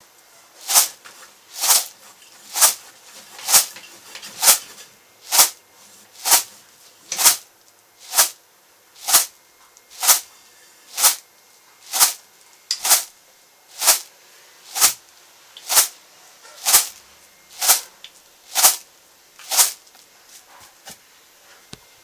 rice in a plastic container
beat, container, plastic, rice